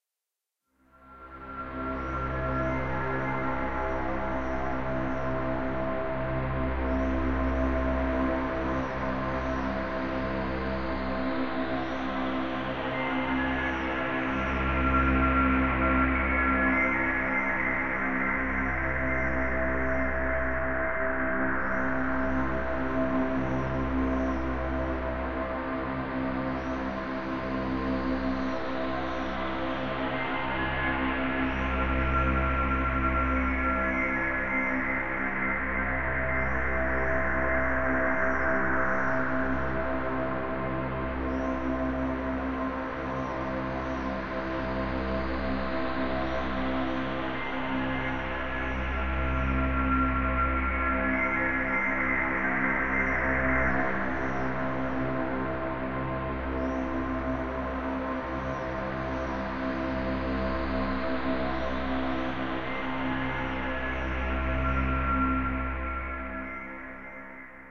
cine background5
made with vst instruments